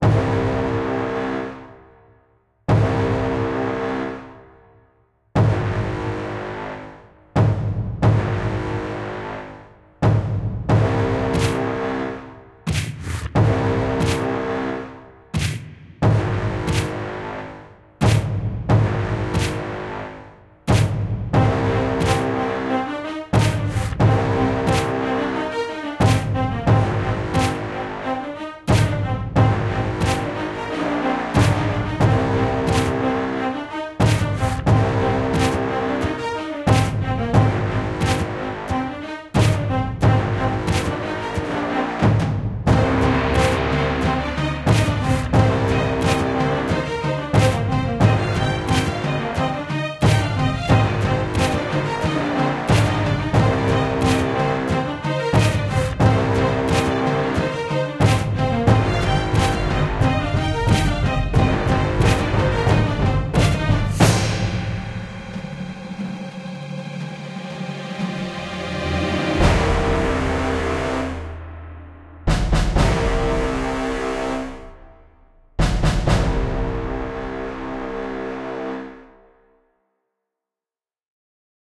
An epic orchestral cue suitable for a film / video game trailer or an intense scene. If you're going for the modern Hollywood sound, look no further.
Interwoven, pulsing strings, epic storm drum percussion, and all the brass BWAAAH you could ever need.
90bpm